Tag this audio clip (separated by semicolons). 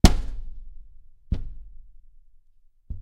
break; breaking-glass; indoor; window